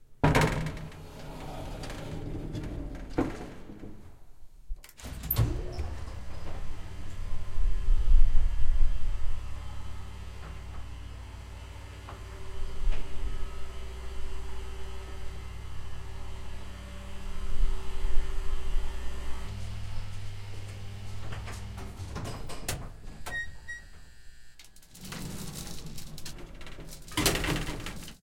Elevator OldApartmentBuilding
This is an old elevator in an old apartment building, with sliding cage doors.
apartment-building, elevator, rattle